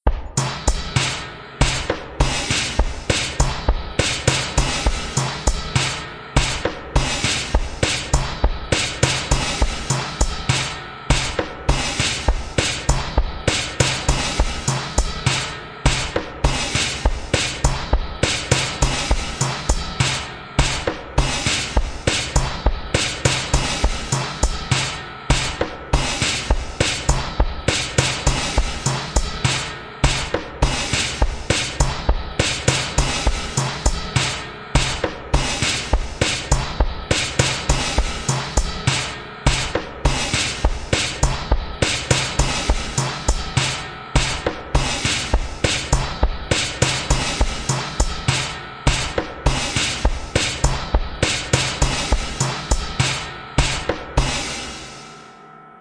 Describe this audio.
Kastimes Drum Sample 2
drum-loop, breakbeat